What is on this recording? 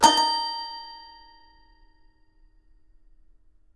Toy records#04-D#3-03
Complete Toy Piano samples. File name gives info: Toy records#02(<-number for filing)-C3(<-place on notes)-01(<-velocity 1-3...sometimes 4).
instrument, keyboard, piano, sample, samples, toy, toypiano